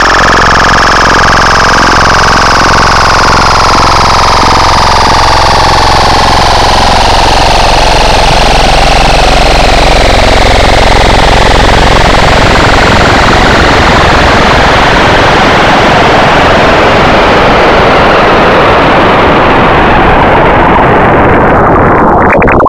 helicopter-like periodic chaos sound, becoming more muffled.
made from 2 sine oscillator frequency modulating each other and some variable controls.
programmed in ChucK programming language.